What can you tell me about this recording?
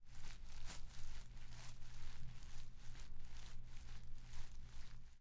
Gently walking over grass